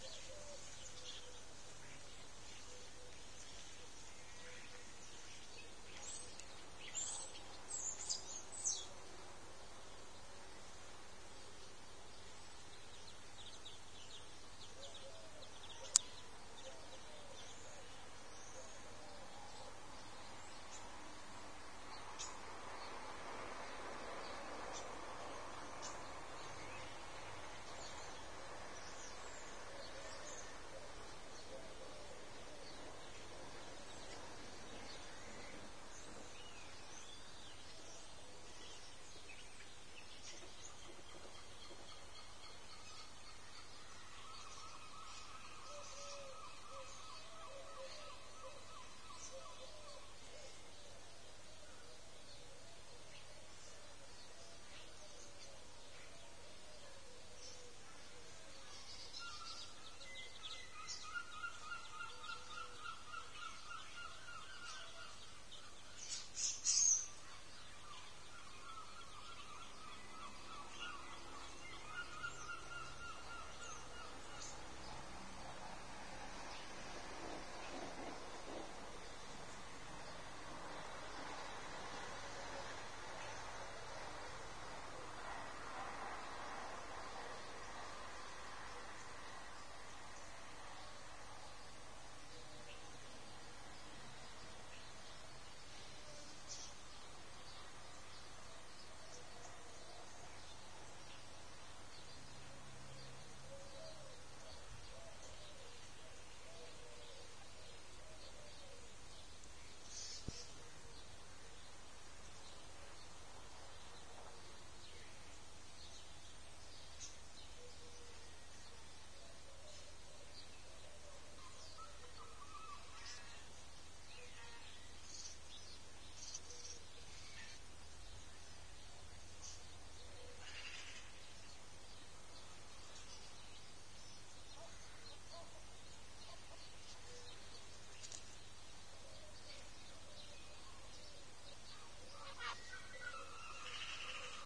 Early morning garden birdsong with Seagulls and a train in the background